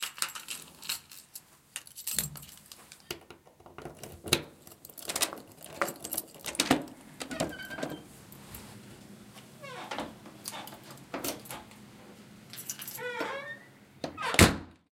Key unlocks a squeaky door, the door opens and gets closed
A key is placed into a metal keyhole of a wooden door. The key is turned once and the squeaky door unlocks. You hear a slight echo as the door lock opens. afterwards the door is closed.
close, closing, creak, door, doors, gate, handle, key, lock, open, opening, slam, squeaky, wooden